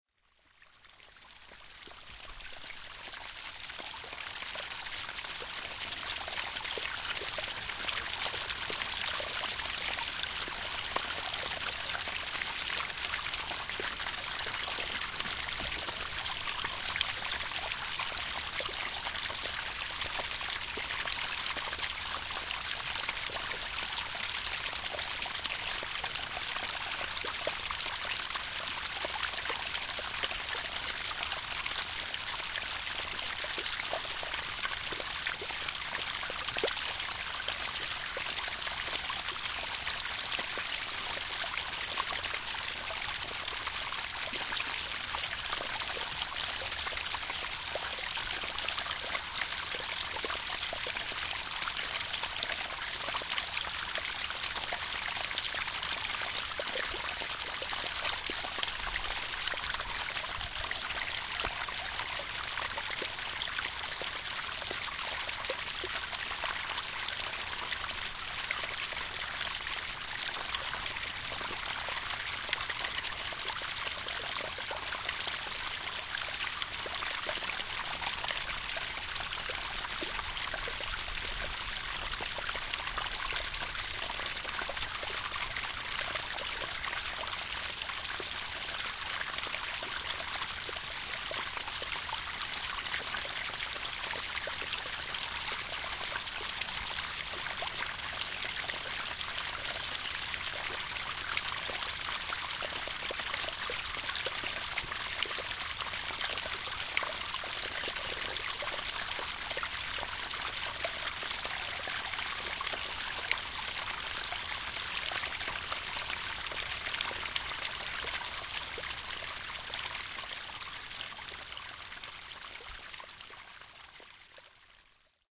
Melted snow 081115T2155
This recording was made 15 Nov 2008 at 21:55 when I was walking my dog. At a distance I heard running (melted) water so I took my H2 and went to the place where the sound originated from. Recorded at GPS coordinates N59 16.303 E18 04.460.
water, field-recording, flow